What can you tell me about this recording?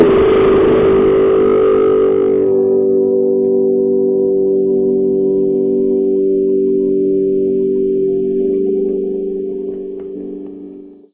I recorded myself making ringing feedback noise with my guitar through a valve amp, plus some wah.